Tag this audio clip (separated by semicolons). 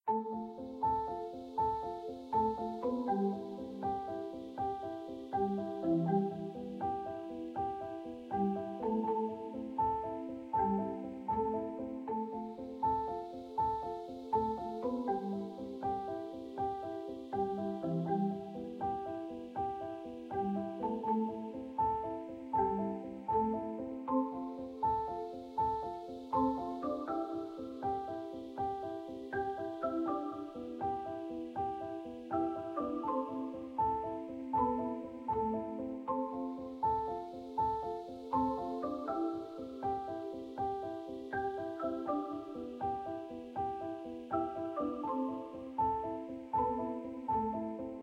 atmospheric; calm; inspirational; joy; loop; melodic; piano; soft; warm